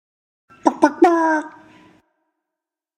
Chicken imitation reverb
A man clucking like a chicken. Reverb added.
chicken, cluck, clucking, imitate, imitation, male, man, vocal